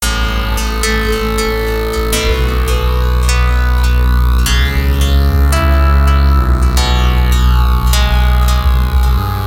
Audacity Base Loop
Here is the file mentioned in the forum. I created the base using only Audacity. I will add $25 to the Pay-Pal account of the user who comes up with the best song using this file as your base. You can only use Audacity and it's associated plug-ins. Let's keep it honest... this is just for fun folks.
To make a submission send me your sounds link in a private message.
Music, Wave, Creator, Saw, Pluck, Song, Prize, Audacity, Loop, Base, 25, Challenge, Dollar